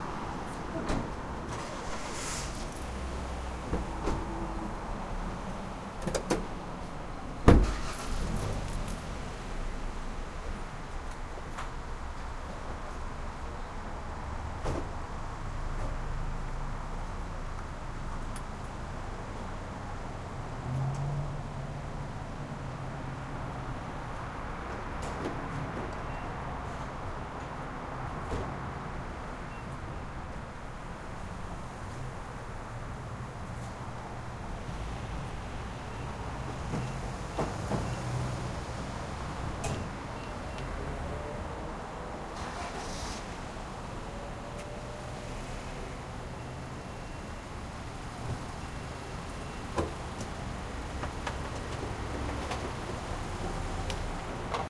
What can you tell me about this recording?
Gas Station Ambience
Atmospheric recording of a gas station in the city
gas-station, urban, cars, beep, gas, station, atmospheric, ambience, city, road